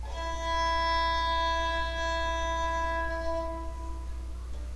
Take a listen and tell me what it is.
Tenor Nyla E5
A bowed banjo from my "Not so exotic instruments" sample pack. It's BORN to be used with your compositions, and with FL Studio. Use with care! Bowed with a violin bow. Makes me think of kitties with peppermint claws.
Use for background chords and drones.
banjo, bowed, calm, puffball, serene, varazdinpeppermint, violin-bow